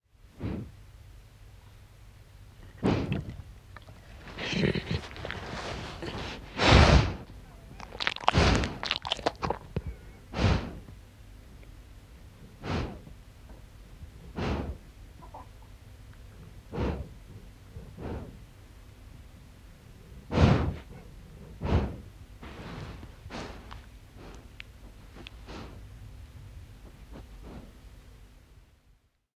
Ajokoira rupeaa nukkumaan. Huokauksia, tuhahduksia, nuoleskelee huuliaan. Lähiääni.
Paikka/Place: Suomi / Finland / Lapinjärvi
Aika/Date: 10.08.1969